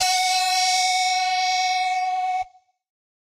Live Dry Oddigy Guitar 11 OS
live, grit, bass, free, bitcrush, guitars, distorted